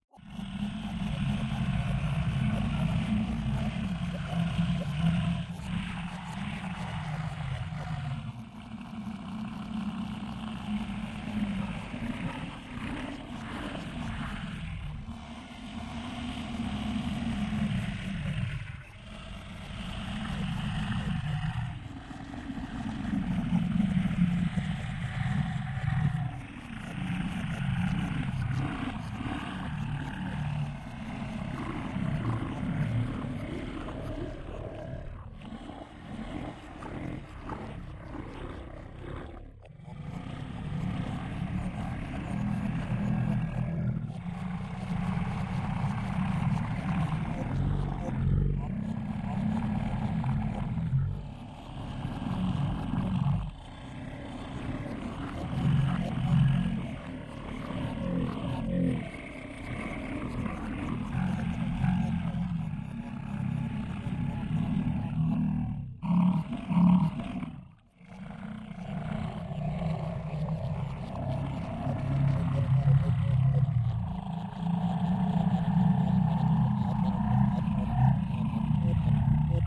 03 - grain delay
2nd step of sound design in Ableton. Added delay and other parameters from Ableton's Grain delay.
freaky, low, sound-design, sounddesign, strange, weird